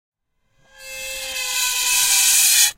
ripping air racing team
recordings of a grand piano, undergoing abuse with dry ice on the strings